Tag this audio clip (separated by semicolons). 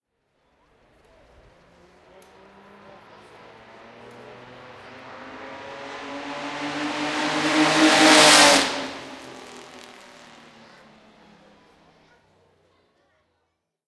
w125; circuit; historic; engine; 1937; belgium; vintage; mercedes; race